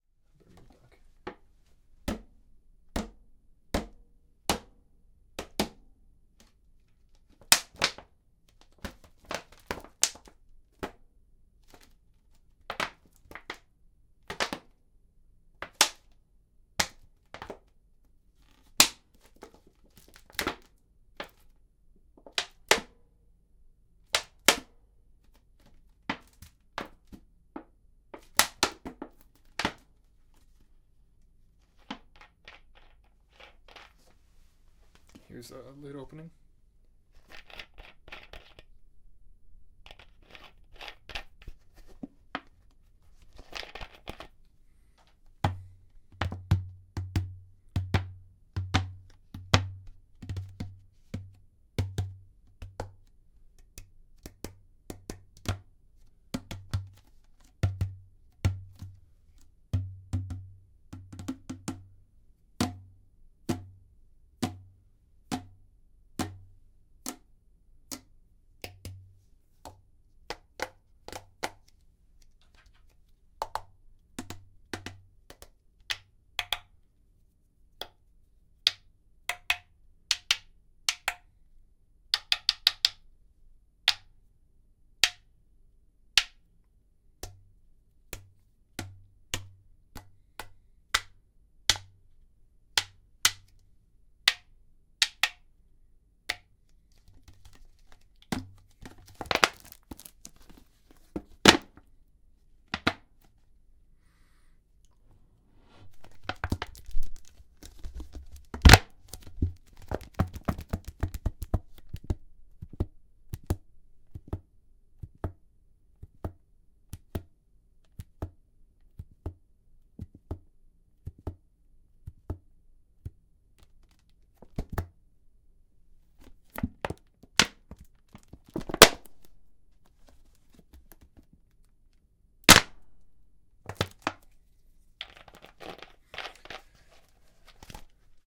Sounds made using an empty plastic bottle.